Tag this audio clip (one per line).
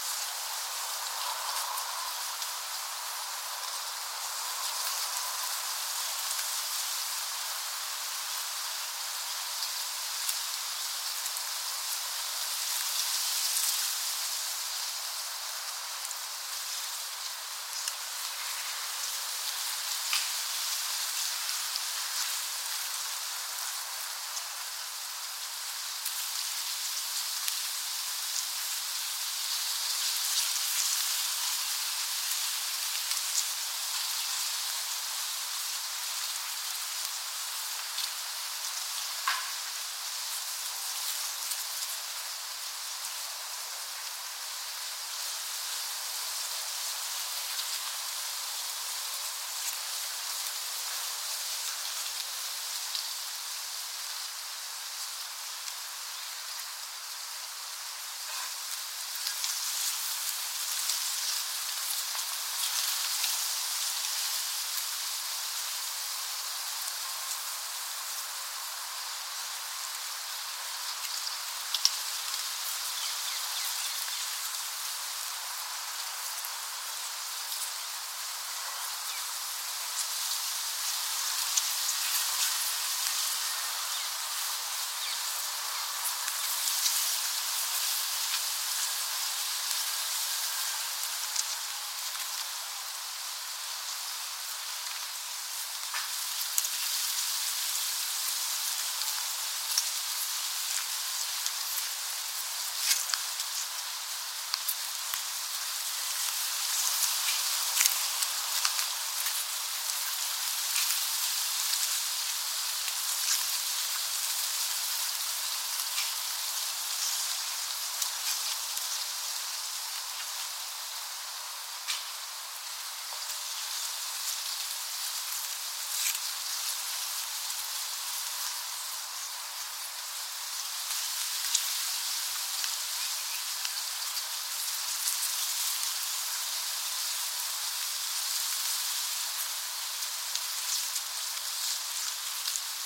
leaves
windy
wind
outdoors
ambient
rustle